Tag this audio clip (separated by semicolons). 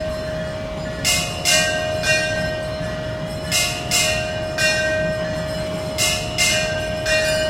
bell; hindu; temple